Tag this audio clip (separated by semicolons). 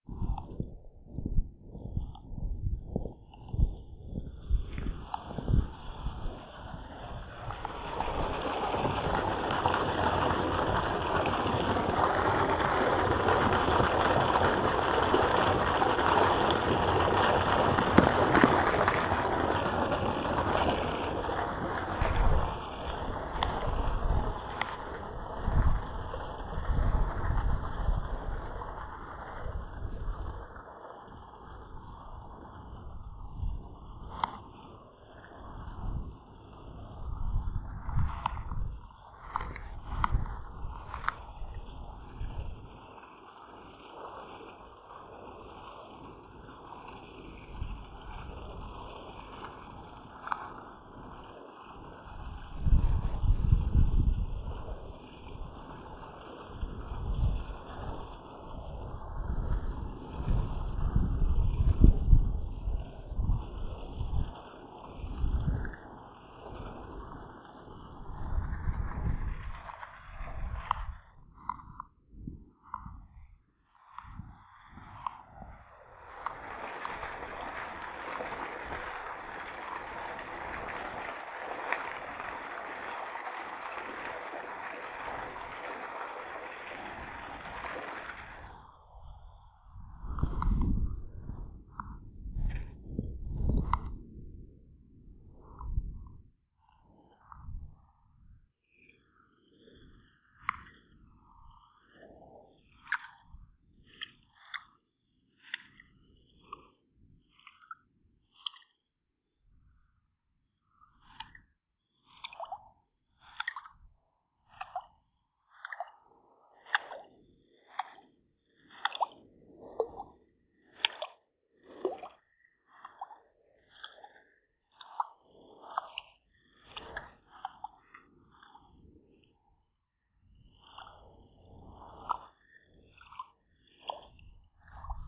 water; ulp-cam; field-recording